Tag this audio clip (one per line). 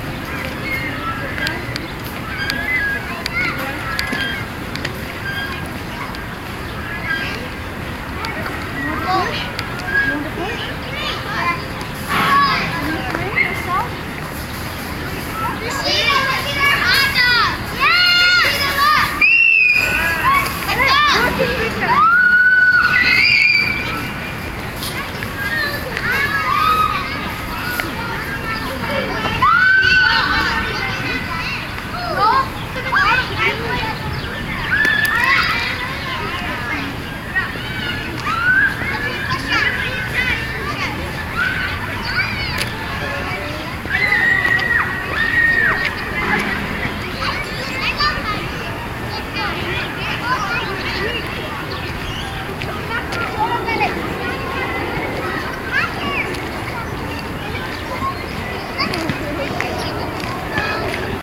field-recording
park
playground